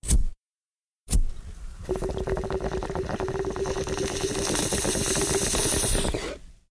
Double lite Toke
Bong Toke with 2 lighters